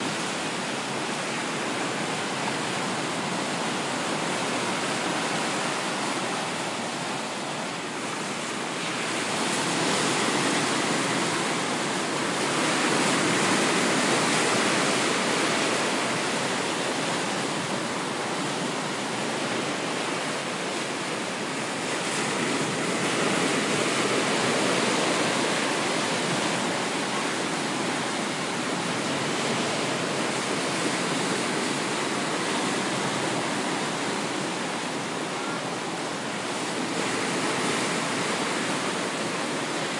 Ocean Waves Crashing HighFrequency
field-recording, waves, crashing, ocean, wind, beach, nature